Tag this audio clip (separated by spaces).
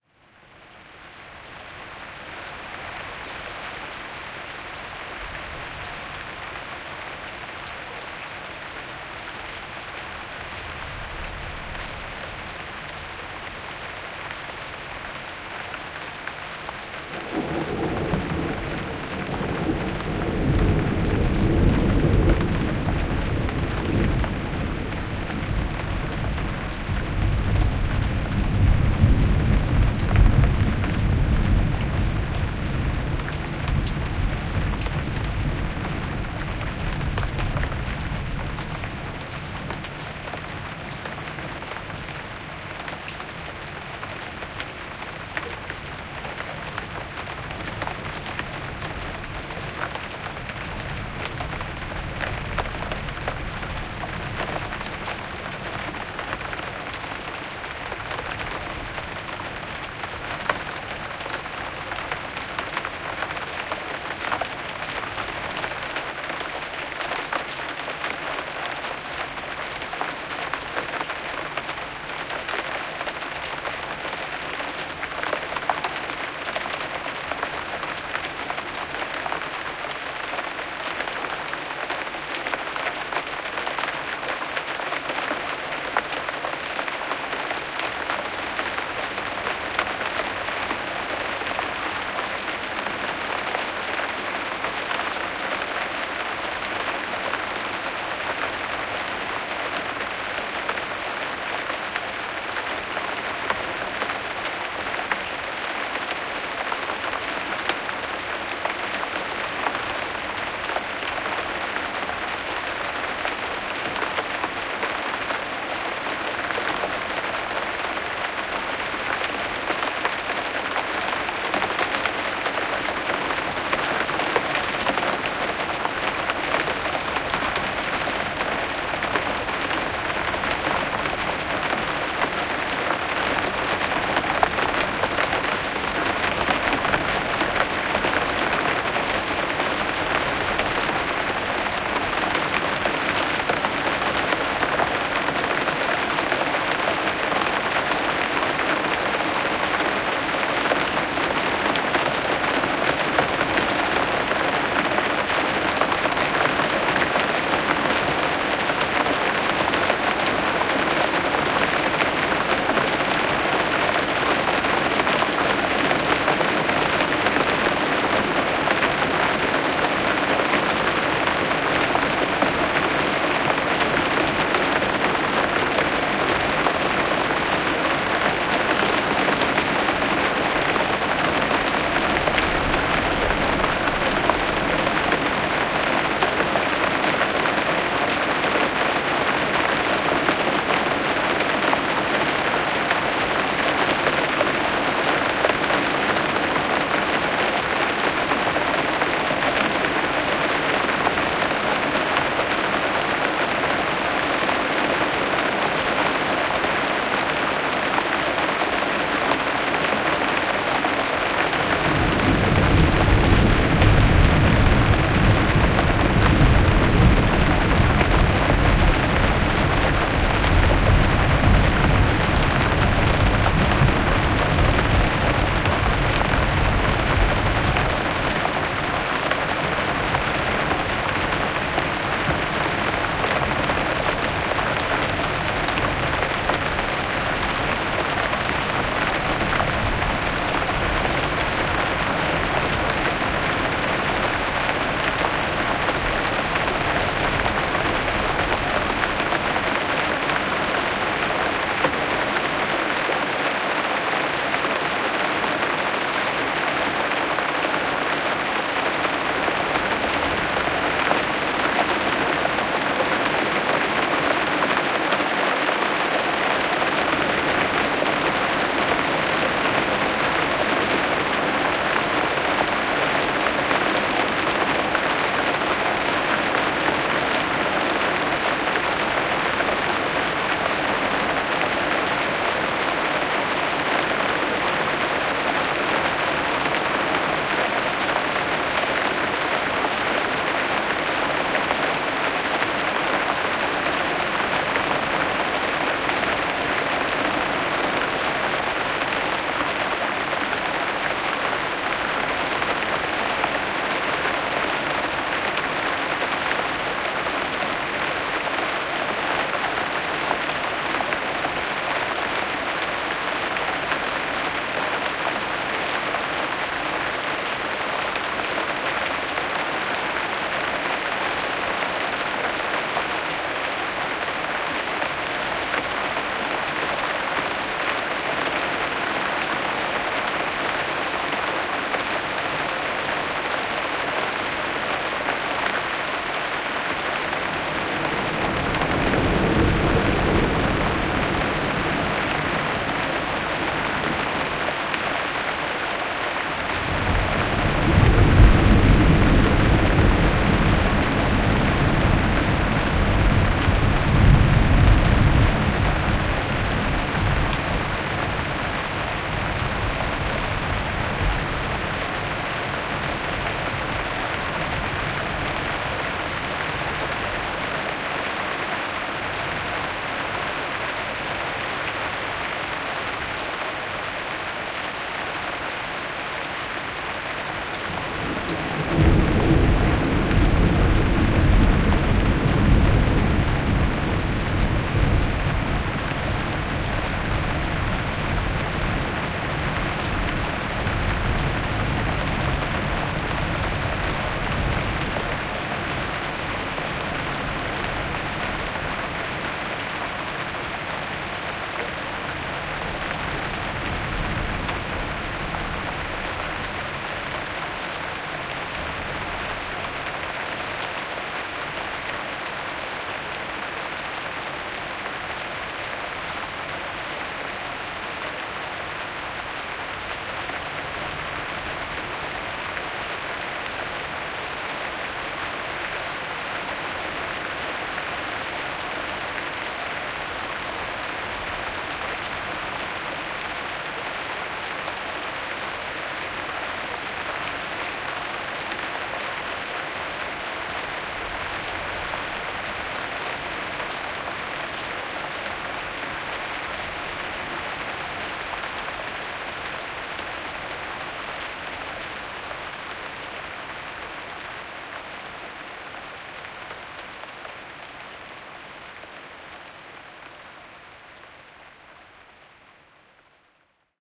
attic field-recording rain rain-in-attic rain-recording texas thunder